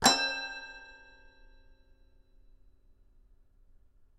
Toy records#01-C3-03
Complete Toy Piano samples. File name gives info: Toy records#02(<-number for filing)-C3(<-place on notes)-01(<-velocity 1-3...sometimes 4).
instrument, instruments, sample, studio, toy, toypiano, toys